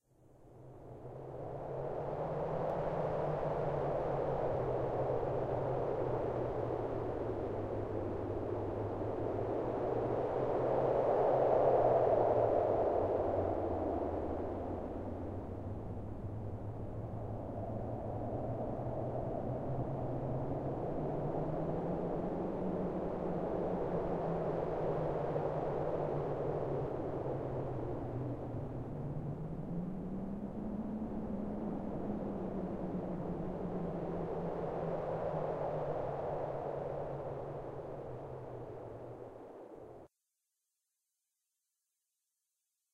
Storm Winds
A series of samples that sound like a really windy day. I imagine the wind rushing by on an autumn day creating random whirling and whooshing sounds. These samples have been created using my own preset on the fabFilter Twin 2 Soft Synth. I had great fun experimenting with the XLFO and Filters to make these sounds.
Autumn, Nature, Sounds, Stormy, Whirling, Wind